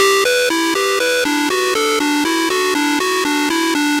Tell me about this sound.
loopable, good for dj or club projects